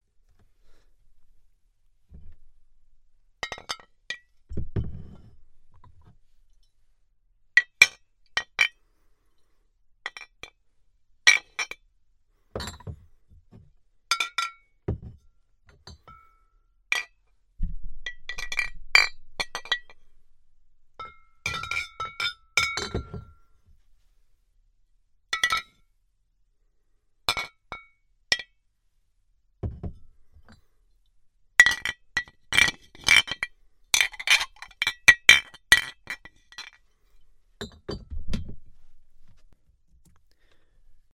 I recorded the sound of some bottles clinking together for a project I'm working on, and thought someone else might find it useful too!